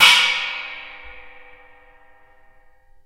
12 inch china cymbal struck with wooden drumstick.